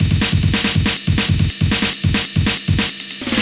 Made in the style of Venetian Snares. Not the best break, as it is my first. It was intended to be glitchy, but it only works in songs that are like Venetian Snares. To tell you the truth, I don't like it that much. Made with VEXST's amen break kit in LMMS.
thanks for listening to this sound, number 67298
140, amen, bass, bpm, break, breakbeat, breakcore, dnb, drum, glitch